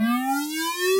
Basic Activate
It was created in Audacity, using a Chirp from from A2 to G3, then using Phaser, Echo and Tremolo effects to create the end result. Finally, it was Normalized to -8dB. Hope you enjoy!
game, activate, computer